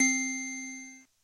Preset Light-Harp C
Casio HZ-600 sample preset 80s synth
Casio, preset